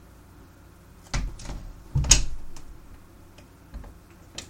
Door click
Quiet closing of a door long range.
click, close, door, soft